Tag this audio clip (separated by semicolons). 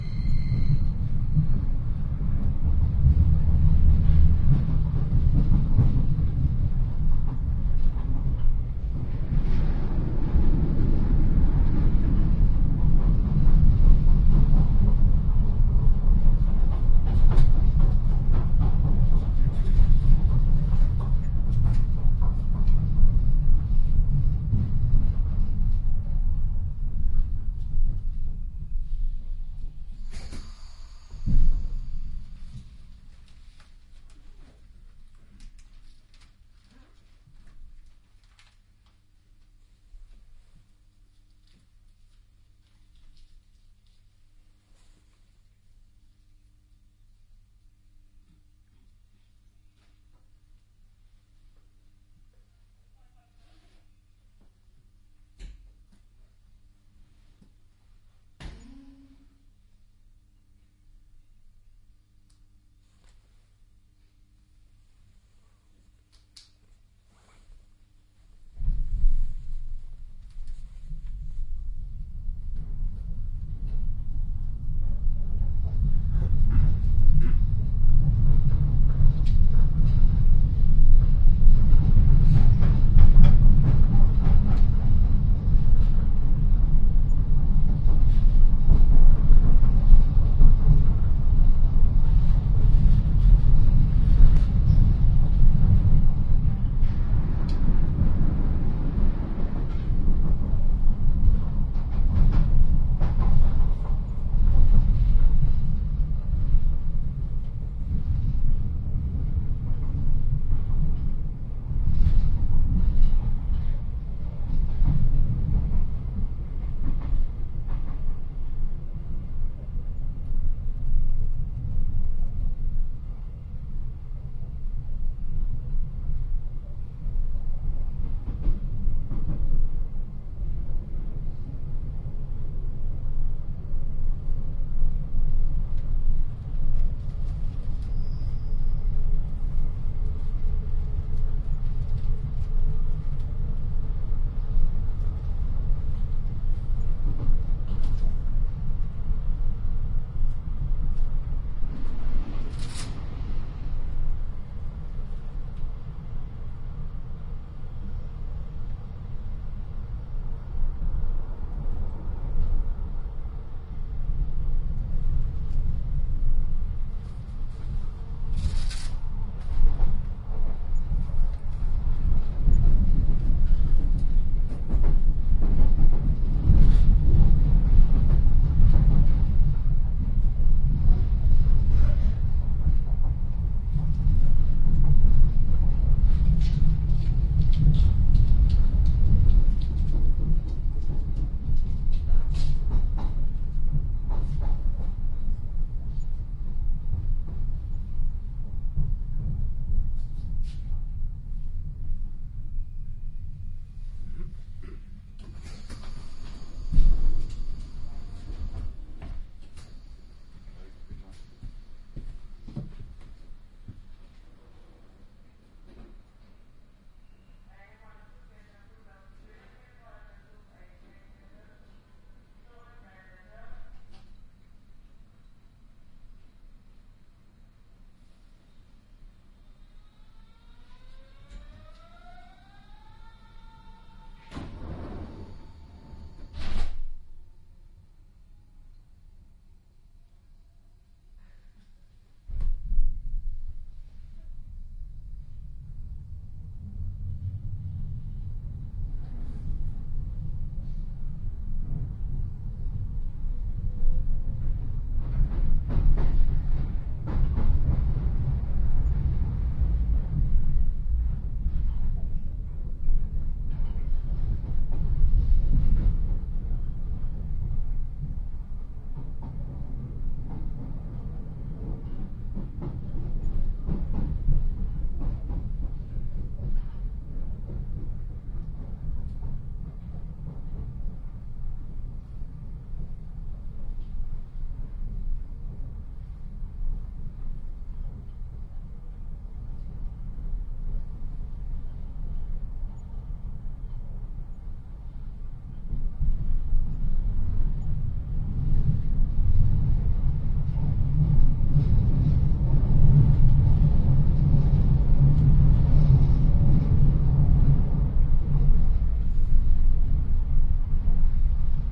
train; field-recording; s-bahn; binaural; berlin